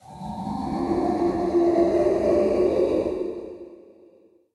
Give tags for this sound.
groan monster

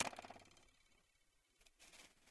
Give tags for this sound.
bizarre metal vibrate

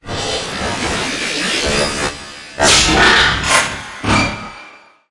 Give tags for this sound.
abstract cinematic dark destruction drone futuristic game glitch hit impact metallic morph moves noise opening organic stinger transformation transformer transition woosh

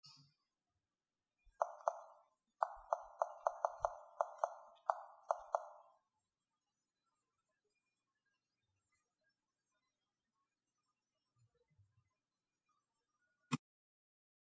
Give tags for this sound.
object Cell Texting

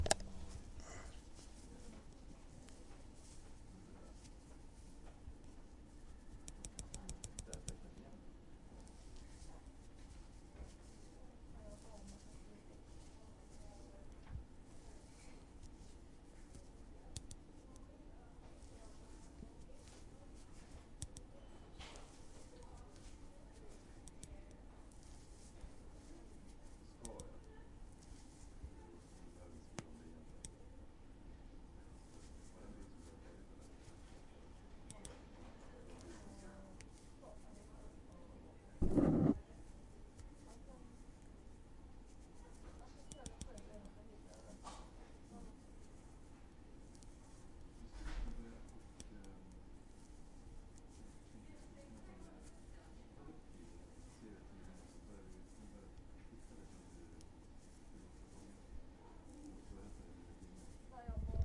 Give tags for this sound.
indoors knit room inside

